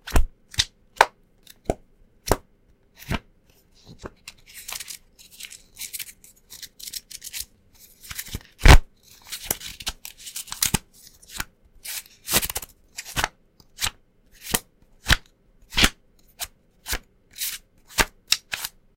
small paper notes
me tossing around and running my fingers through a stack of sticky notes. recorded at my desk.